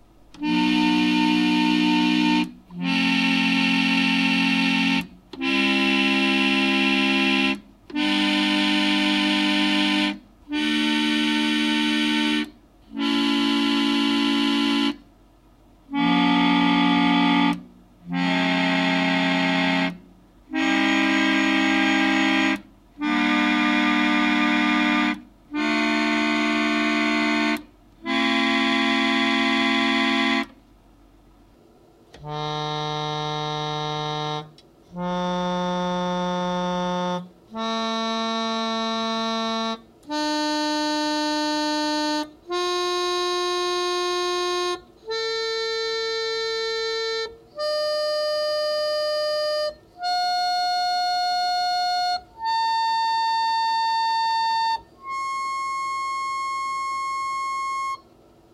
I sampled a small Magnus Chord organ. It's an electric instrument with a wind blower and the sound of an accordeon. First in the file are two sets of chords: first major, then minor. Followed by single tones, every 3 notes. With these it's simple to DIY a sample instrument for your favorite sequencer.